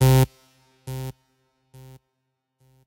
Saw sample delayed and reverbed.
saw, short, reverb, delay